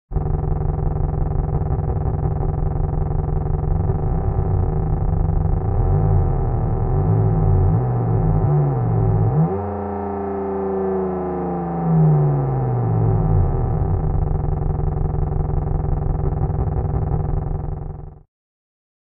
A dragster idling and then revving (synthetic).
Click here to animate this sound!